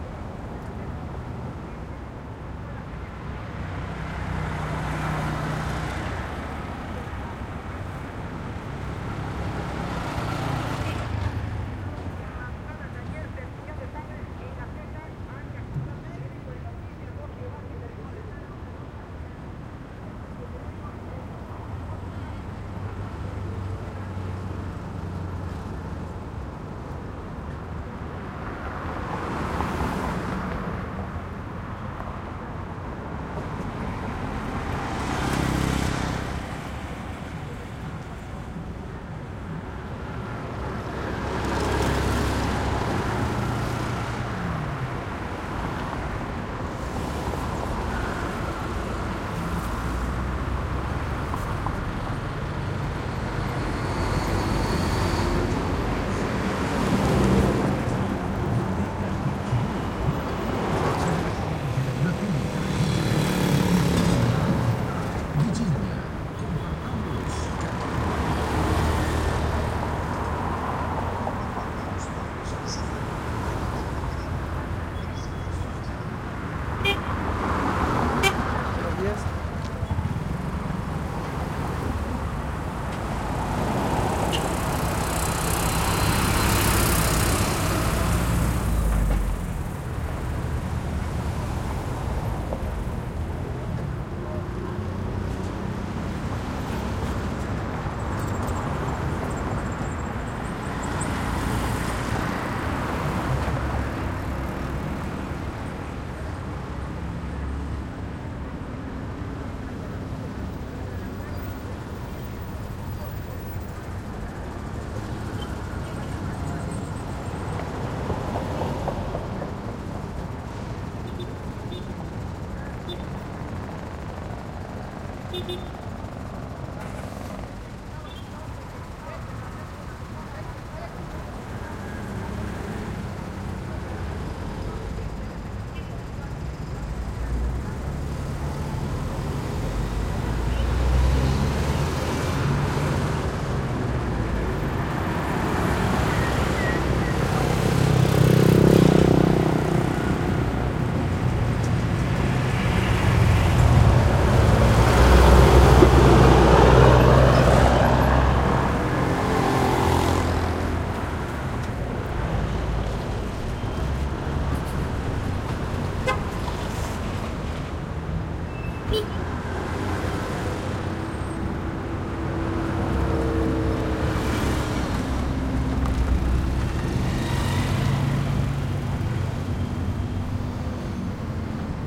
AMB CARRETERA PASS BY CARROS MOTOS JUCHITAN 001
Ambiente Carretera o Autopista con algunos passby de carros y motos en Oaxaca, México
Grabado con una Zoom F4 y un MKH-418 S Sennheiser //
Ambience Road with cars and motocycle passby in Oaxaca, Mexico. Recorded with a Zoom F4 and a MKH-418 S Sennheiser
sennheiser, cars, car, Mexico, oaxaca, pass, by, road, highway, MS